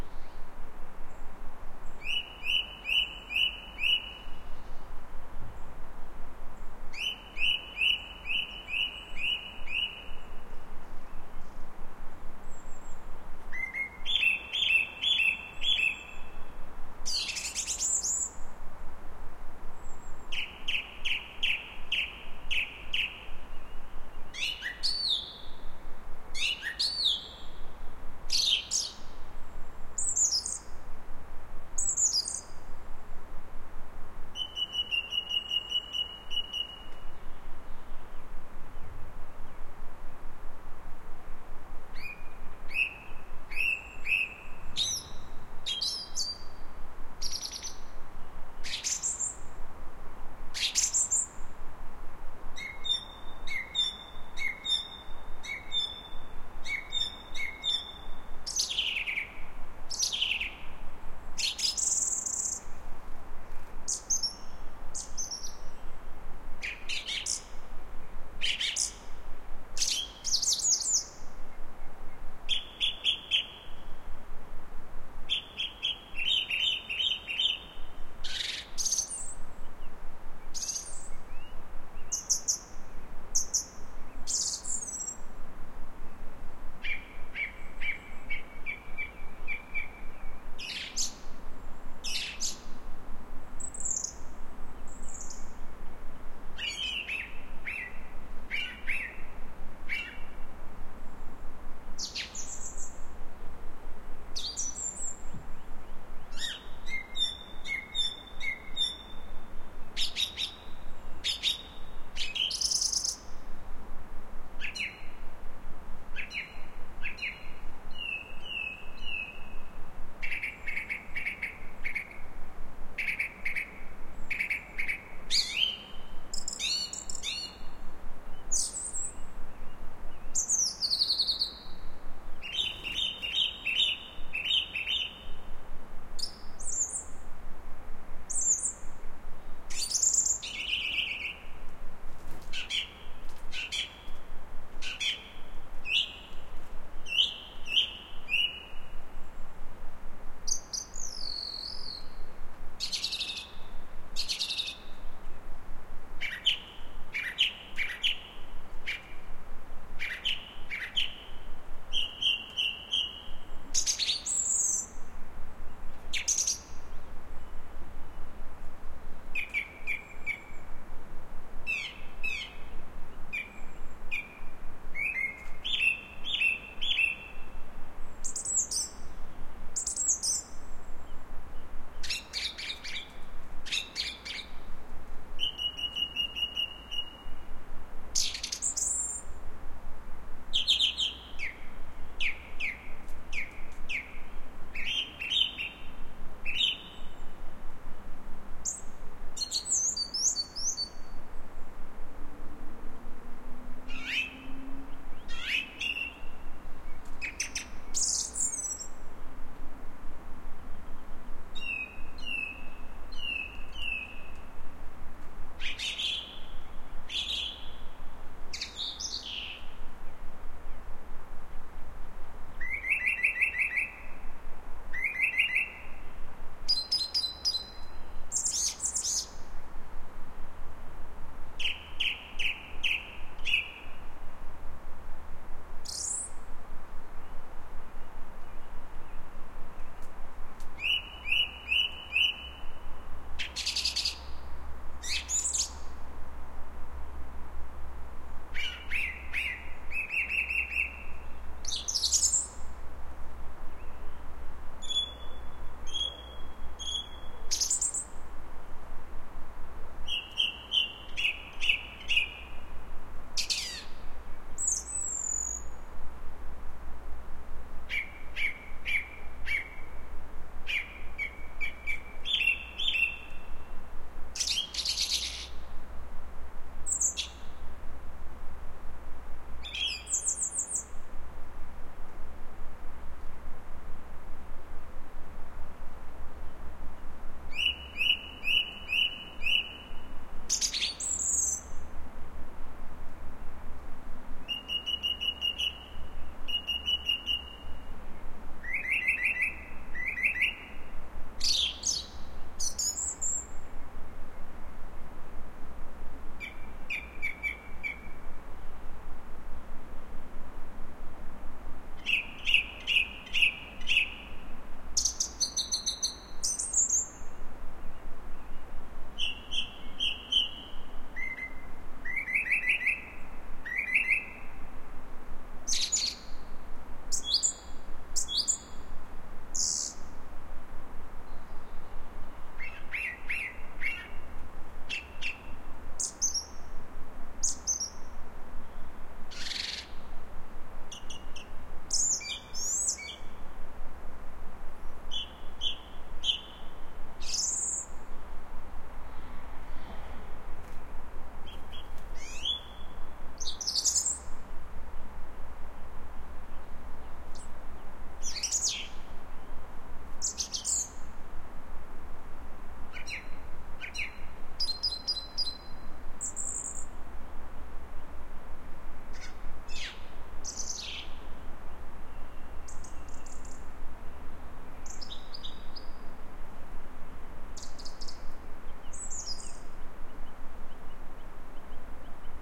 Recording of a Song Thrush (Turdus philomelos)on a Saturday evening in March in Perthshire/Scotland at the edge of a forest. AT3031 microphones, Shure FP-24 preamp into Olympus LS-10 recorder.